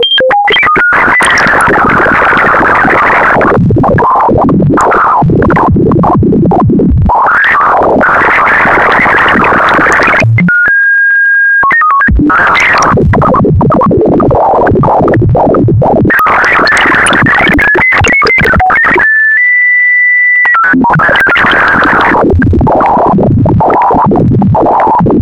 Harsh sound.
Broken bleeps created with a feedback loop in Ableton Live.
The pack description contains the explanation of how the sounds where created.
beep, bleep, circuit-bent, distortion, feedback, Frequency-shifter, harsh, hum, noise, pitch-tracking